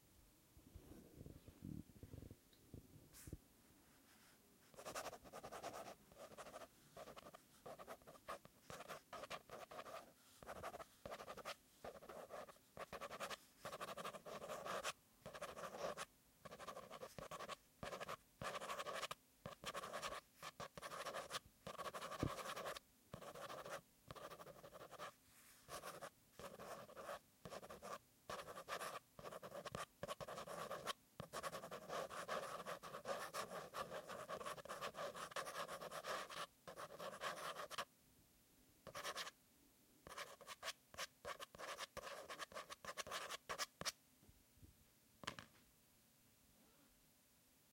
Recorded using a Canon GL1 and an Audiotechnica shotgun mic
(unsure of model number, a cheap one I'm sure). The sound of a fine
point pen scribbling on the hard cardboard of the back of a notebook.
The audio is low so the gain might need to be adjusted. My professor
liked this one.